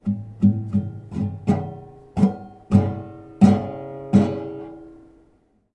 Guitar Fail
Just doing some guitar sounds. Doesn't sound any good really.
Recorded with Zoom H2. Edited with Audacity.
pling, strum, guitar, instrument, instrumental, plong